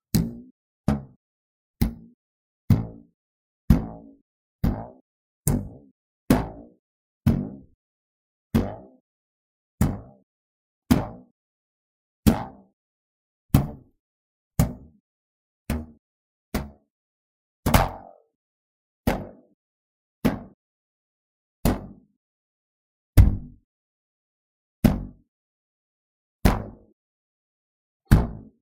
Plucking an Elastic Band
Plucking and pulling a rubber band to get some variation in the sound. Originally recorded as foley for a giant slingshot release. Recorded with a Zoom H4N.
bass, boing, bow-and-arrow, cartoon, cartoony, elastic, flick, pluck, pull, rubber, spring, stretch, thwip, twang, wobble